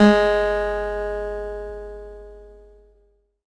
Sampling of my electro acoustic guitar Sherwood SH887 three octaves and five velocity levels